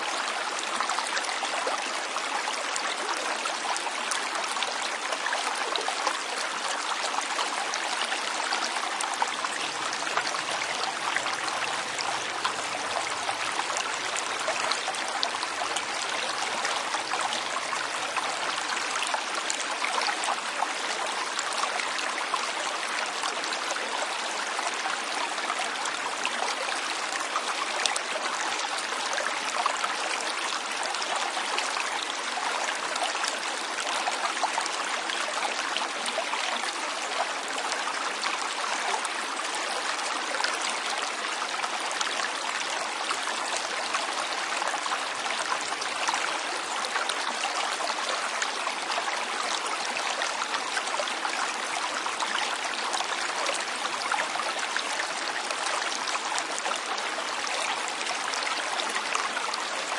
different perspectives of a small stream near Aracena, Spain. Microphones set in the middle of the current with a little tripod. M/S stereo
field-recording, water